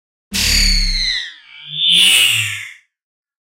THROBBING, SCREECHING SWISH. Outer world sound effect produced using the excellent 'KtGranulator' vst effect by Koen of smartelectronix.
sound, sci-fi, processed, horror, effect, fx